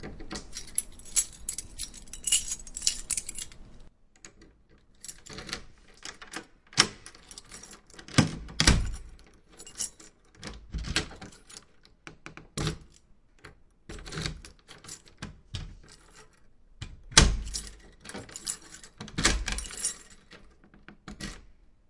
Lock and key sounds.
Recorded with Zoom H2. Edited with Audacity.